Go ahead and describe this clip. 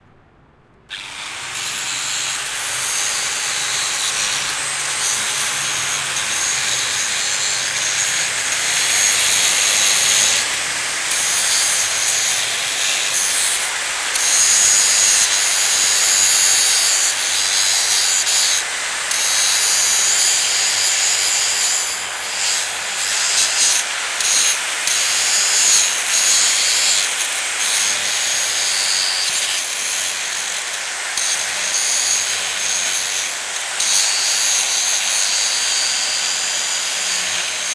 Sound of angle grinder . I Recorded with H6 ZOOM
Angle, Factory, grinder, iron, Machine, Milling, Strange